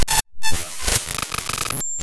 text file opened as raw data and reversed
data, raw